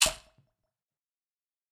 The Switch 5
Tweaked percussion and cymbal sounds combined with synths and effects.